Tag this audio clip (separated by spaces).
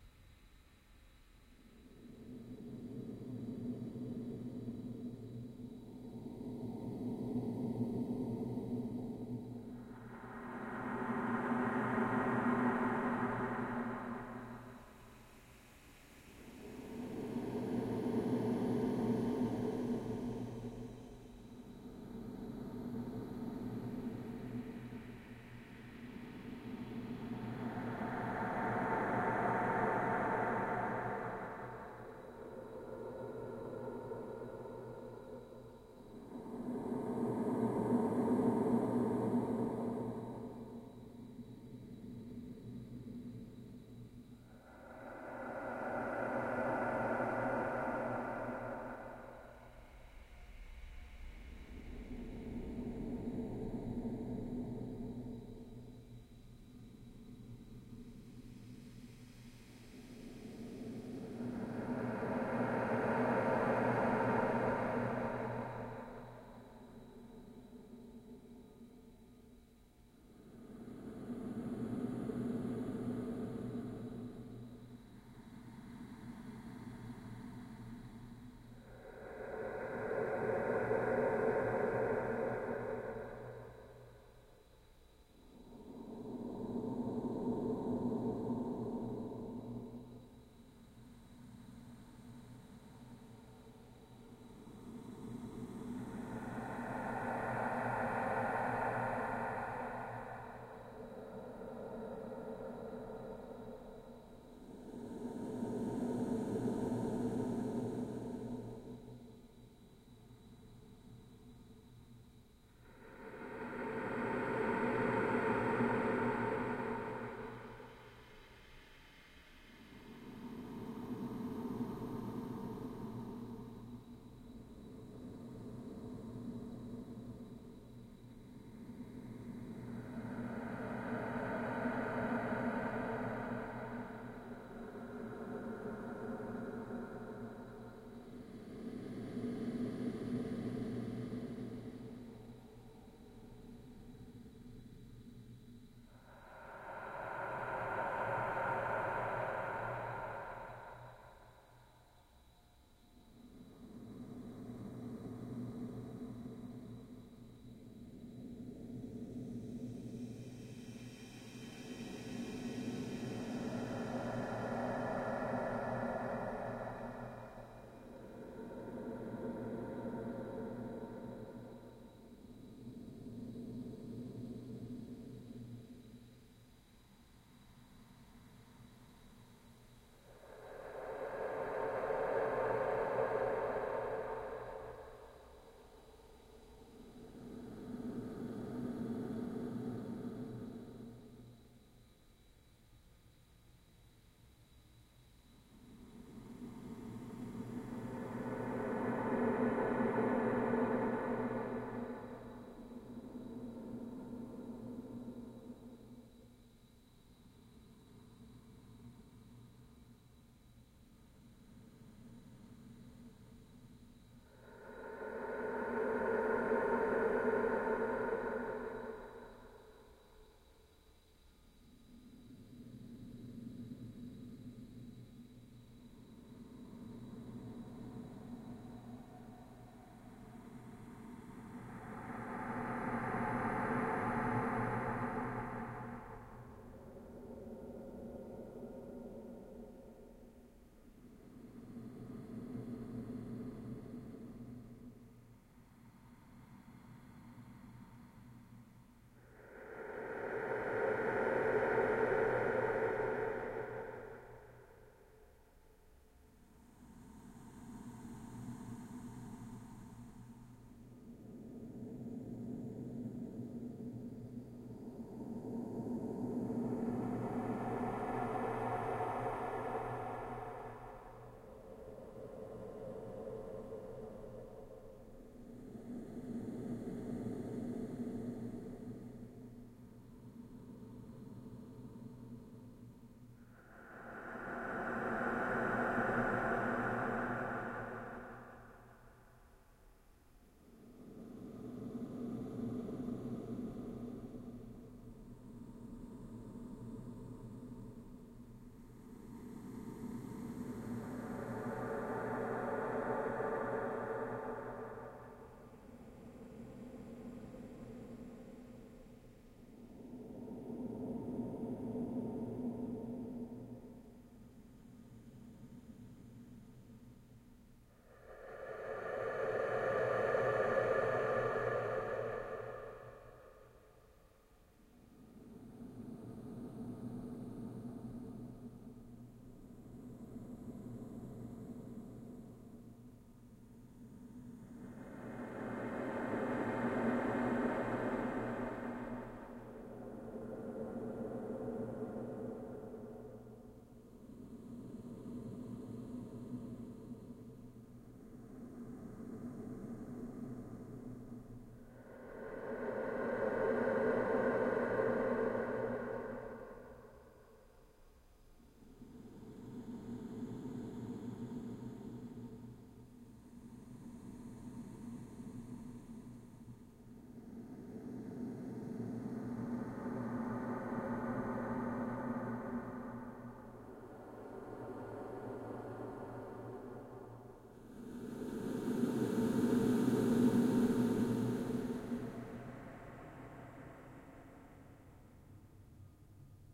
class edit field-recording sound-design